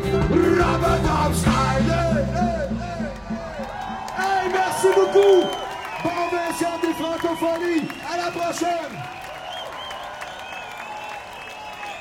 Latest seconds of a music live performance in Montreal, hand clapping, a guy says something in French to the audience. Soundman OKM binaurals, Fel preamp, Edirol R09